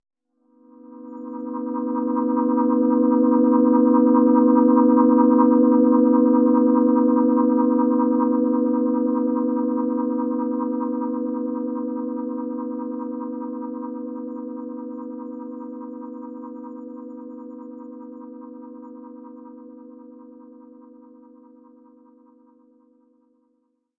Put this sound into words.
PPG 003 Lfoed G#4
This sample is part of the "PPG MULTISAMPLE 003 Lfoed"
sample pack. It is an experimental sound effect, suitable for
experimental music or as a sound effect. It consists of a texture with
some LFO
and fades on it. In the sample pack there are 16 samples evenly spread
across 5 octaves (C1 till C6). The note in the sample name (C, E or G#)
does not indicate the pitch of the sound but the key on my keyboard.
The sound was created on the PPG VSTi. After that normalising and fades where applied within Cubase SX.
lfo, multisample, ppg, soundeffect